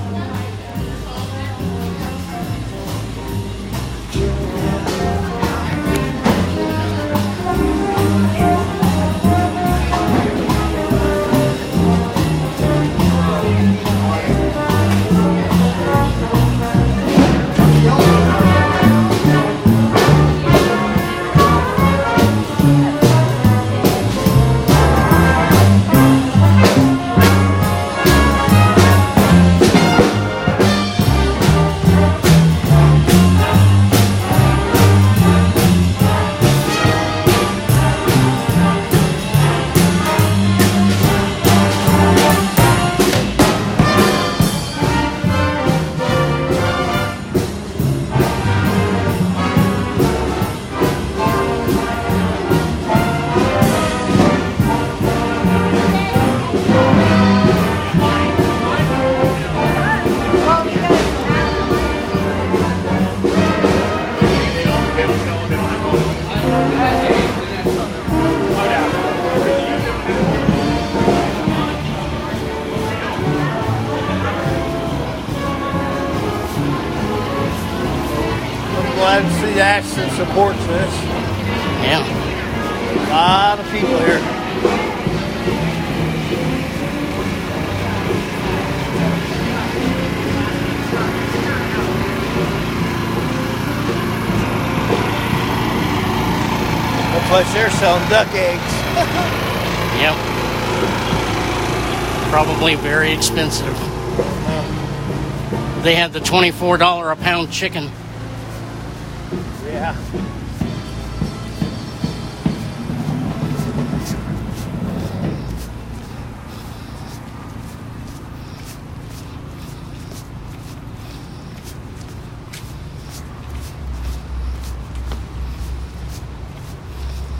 Walking out through the Atchison Farmer's Market back to the van to go home. A bit of people talking and greeting each other, some chatter between me and the bus driver about a meat and eggs seller with rather high prices, as we walk back. Atchison Jazz Express is playing as it's National Farmer's Market week, they don't always have live music, it's usually a dj. Recorded with IPhone SE2020 internal mic, then edited with Goldwave on my PC. Toward the end you hear the generator for the Thai food truck from Leavenworth as we walk by it.
breathing, Atchison, people, jazz, music, brass-band, band, talking, feet, food-truck, footsteps, human, field-recording, summer, walking, ambience, talk, voices, rural, farmers-market, generator, small-town